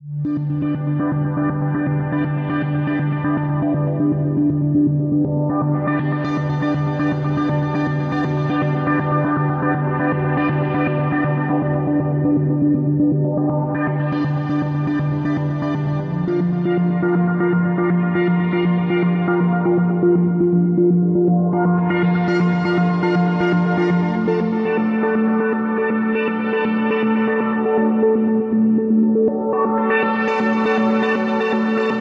Space Adventure Seq (120 BPM)
Space Adventure Sequence with filter fx. Key: Dm, 120 BPM.
Ambience, Arp, Cinematic, Film, Filter, Loop, Melodic, Seq, Synth